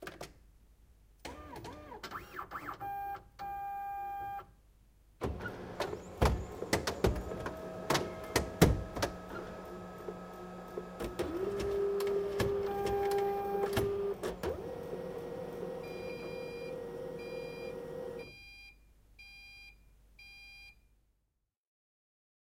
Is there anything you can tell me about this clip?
Printer startup, recorded with a ZOOM H2 field recorder, editing with REAPER, no FX, no EQ.
analog, application, artificial, automation, bionic, command, computer, cyborg, data, electronic, intelligent, interface, machine, mechanical, office, printer, robot, robotic, start, startup, up, word